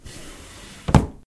Wood drawer C
wooden, open, closing, opening, close, drawer